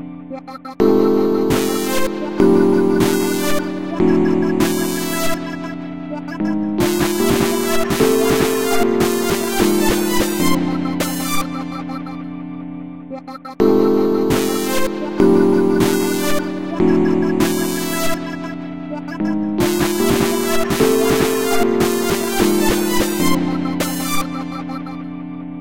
Horribly distorted horn sound